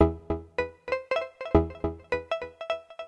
156bpm-3 saw wave- harmonizer-short delay-reverb-
loop with swing 156 beat for minute3 cut saw waveshort delay
delay, electro, loop, machine, reverb